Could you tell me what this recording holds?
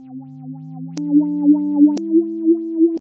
ABIDAR Dina 2022 son4
For my final sound I wanted to create a hope sound such as a person who revives, regenerates in a video game.
To create this effect I added in markers the notes DO RE MI.
I then added the distortion effect then wahwah to give tempo to the notes.
Then I used the grazouilli tone that I changed speed and echoed to give volume to the sound.
Survivor, Orchestral, VideoGame, Life, Hope